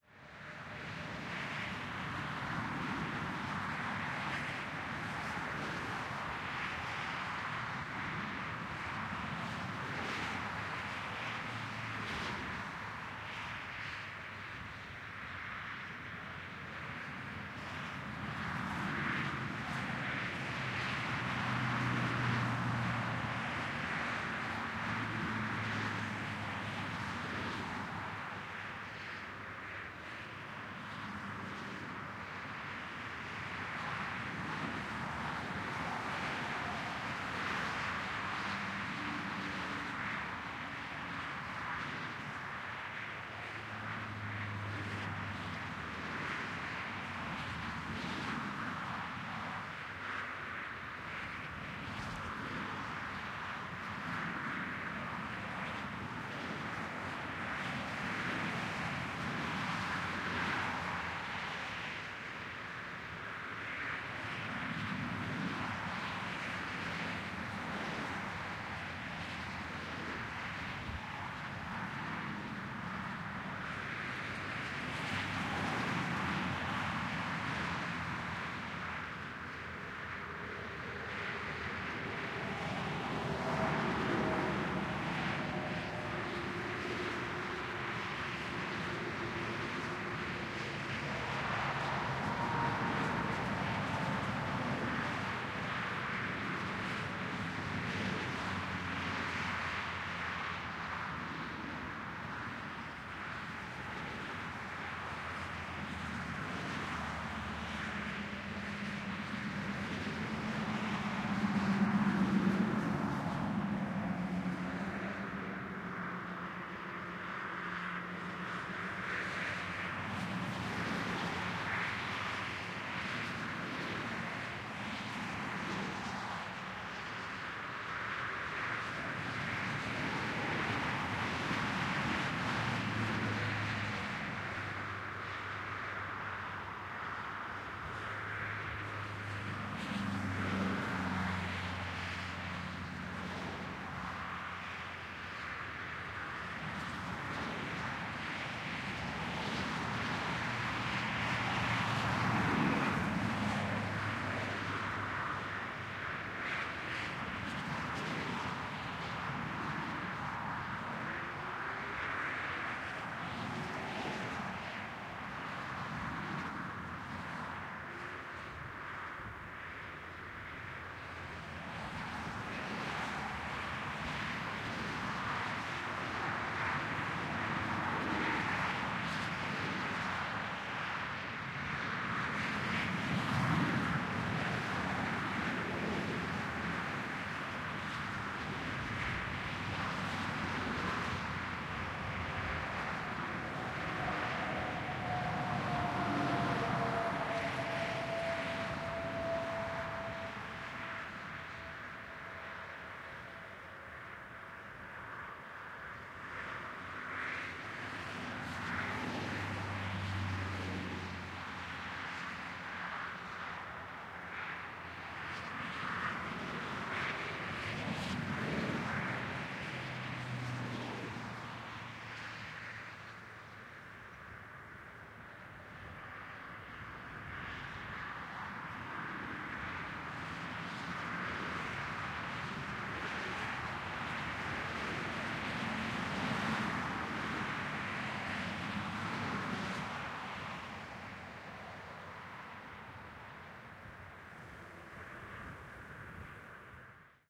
HIGHWAY TRAFFIC passby of cars, trucks and motorbike - 20 meters
Highway traffic, multiple passby of cars, trucks and motorbike, recorded at different distances, stereo AB setup.
Recorded on february 2018, CAEN, FRANCE
Setup : AKG C451 AB setup - Sounddevices 442 - Fostex Fr2le
Highway, traffic, cars, passby, motorbike, trucks